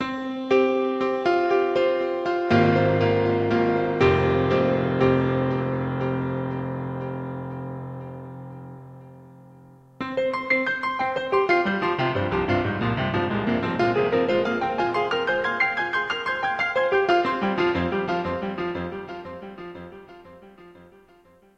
A short riff I made using Komplete.
piano, Komplete, music, riff